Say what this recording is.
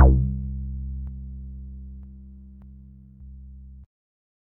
Acid one-shot created by remixing the sounds of